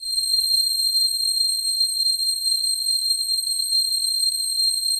STRINGY-4791-2mx2PR D#0 SW
37 Samples Multisampled in minor 3rds, C-1 to C8, keyboard mapping in sample file, made with multiple Reason Subtractor and Thor soft synths, multiple takes layered, eq'd and mixed in Logic, looped in Keymap Pro 5 using Penrose algorithm. More complex and organic than cheesy 2 VCO synth strings.
Bowed, Multisample, Strings, Synth